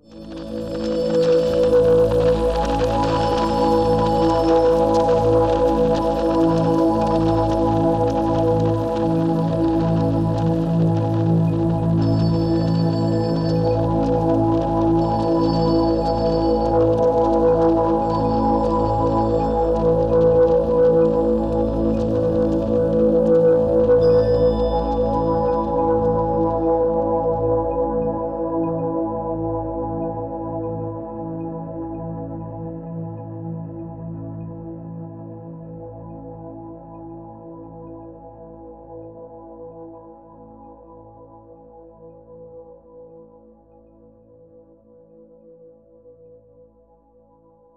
This is a deeply textured and gentle pad sound. It is multisampled so that you can use it in you favorite sample. Created using granular synthesis and other techniques. Each filename includes the root note for the particular sample.
ambient, digital, granular, multisample, pad, space, synth, texture